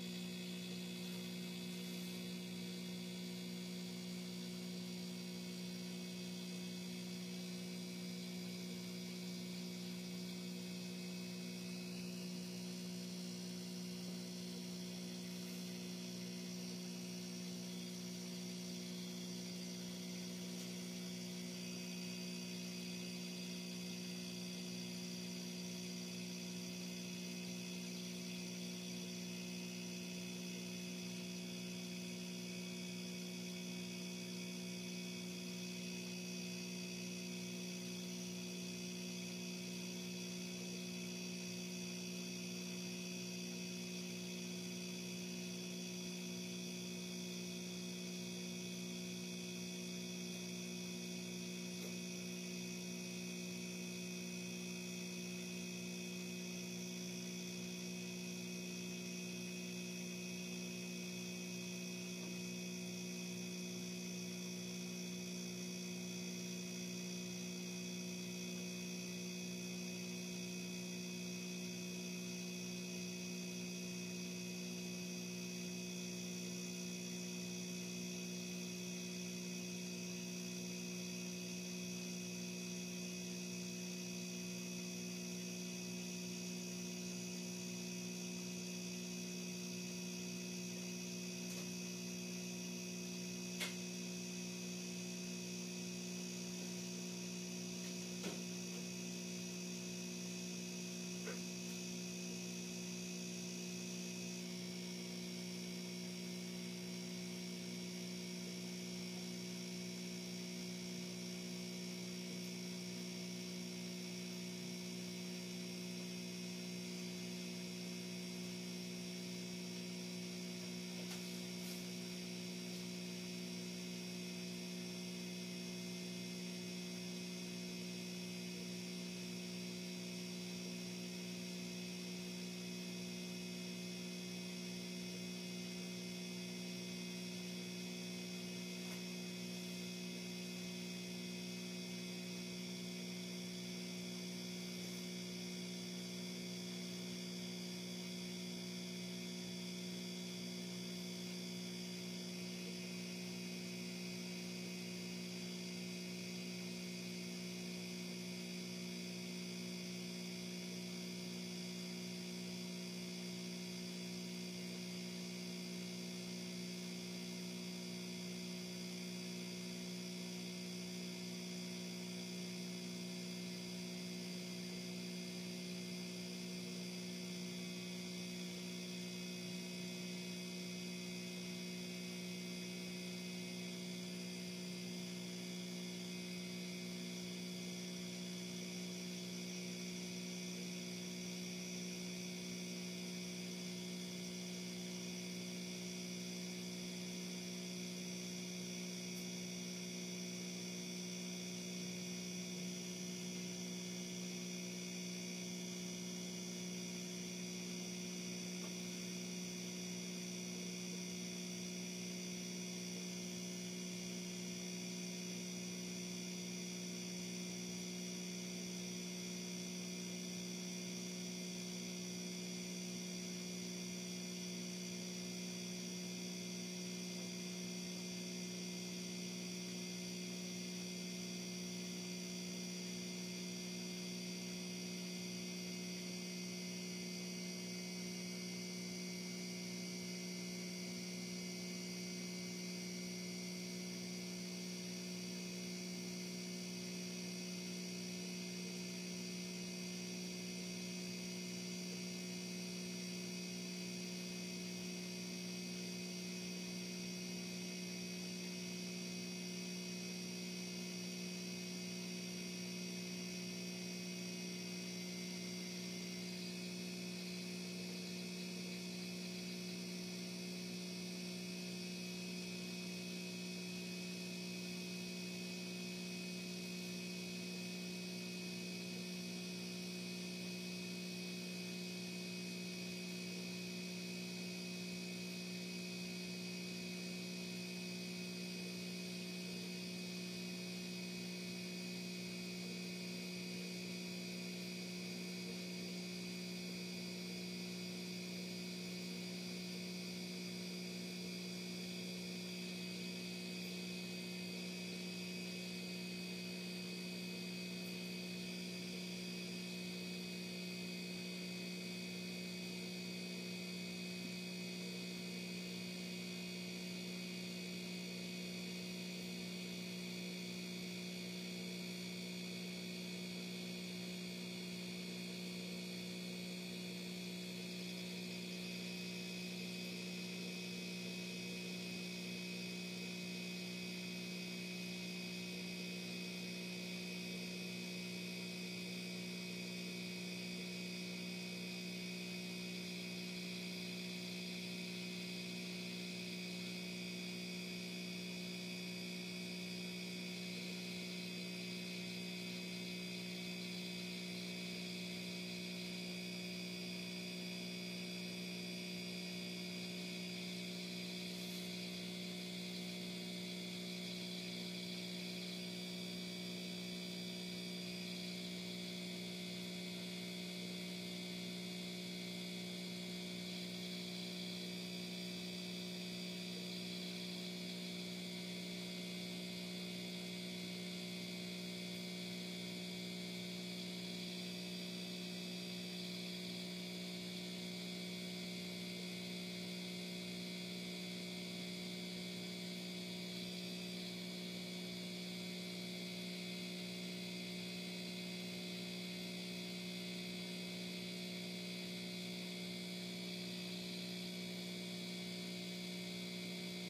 Irritating flourescent light hum
Nearly eight minutes of an irritating fluorescent light humming at you! You're welcome, I guess. (Recorded in my bathroom, but also perfect for offices, schools, and everywhere horrible lights are to be found.)
annoying, bathroom, bulb, bulbs, electricity, fluorescent, fluorescent-light, hum, humming, irritating, light, lightbulb, lightbulbs, lights, office